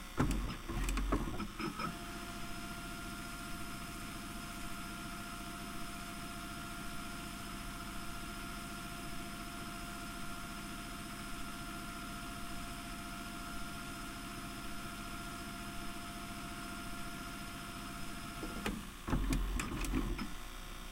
While the tape is playing in the VCR pushing the rewind button and then going back to play.Recorded with the built in mics on my Zoom H4 inside the tape door.
whir, transport